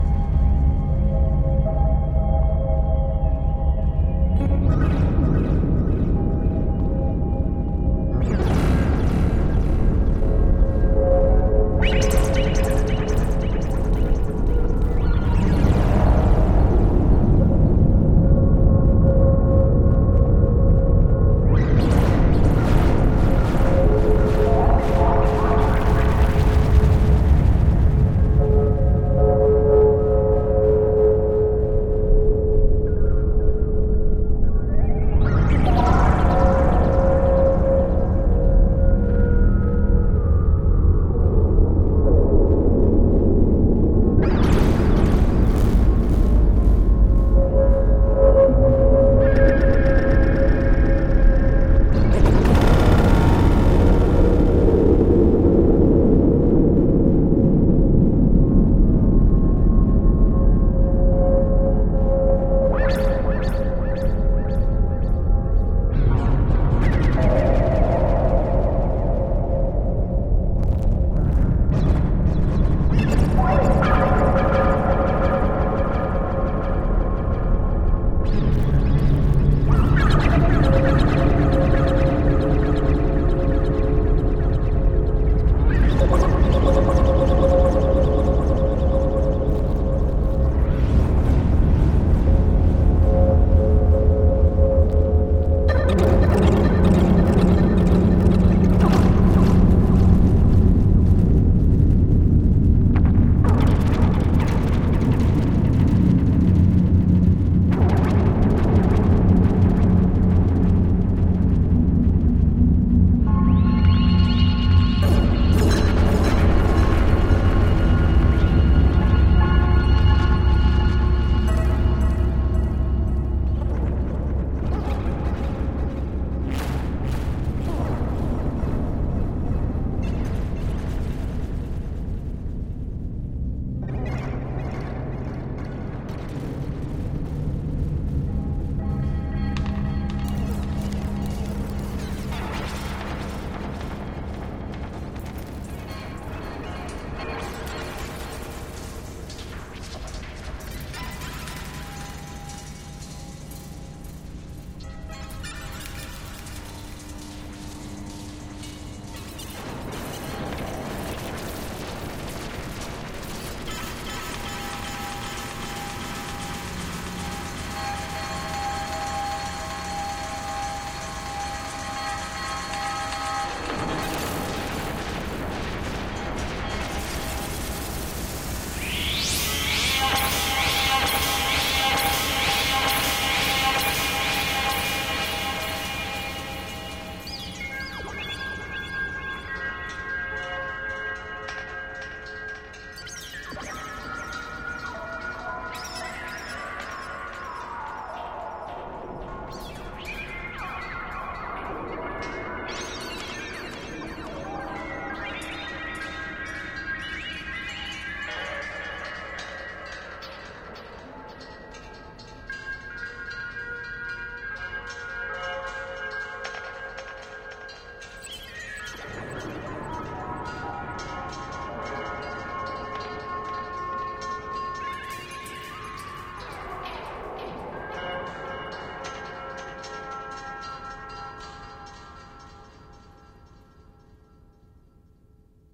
qbist reggae
Sounds ensue
source:
atmospheric, dub, electronic, manipulation, noise, reggae, soundscape, synthesized